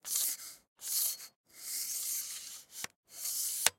Telescopic tool extend
Extending a telescopic tool.
A pair of Sennheiser ME64s into a Tascam DR40.
telescope, garden, metal, metallic, tool, telescopic, extend